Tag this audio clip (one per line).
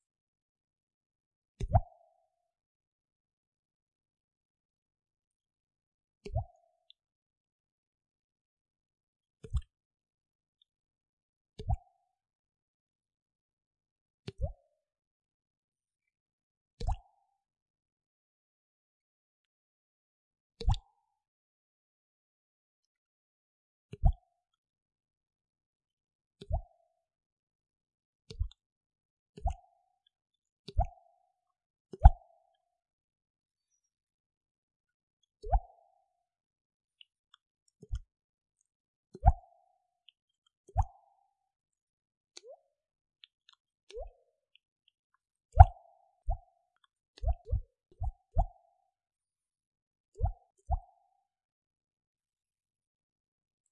drip
dripping
drips
drop
droplet
droplets
drops
faucet
water
waterdrop
waterdrops